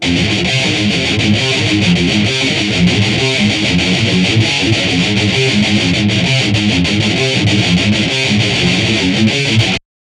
rythum guitar loops heave groove loops